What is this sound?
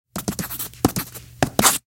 writing-long-sketch-06
Writing on paper with a sharp pencil, cut up into phrases.